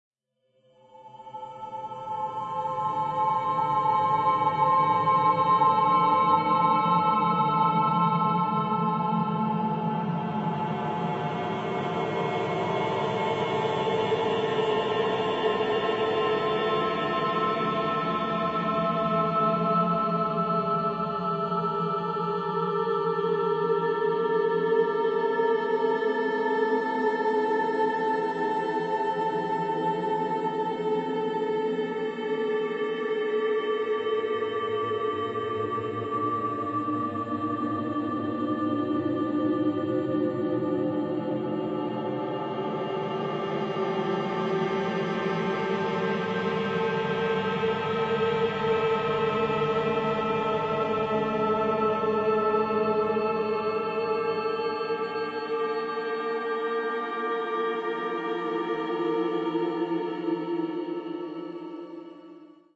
Complex Drone 1
An evolving, mysterious drone perfect for sci-fi movies. Sample generated via computer synthesis.
Scary, Sci-Fi, Space, Spooky, Synthetic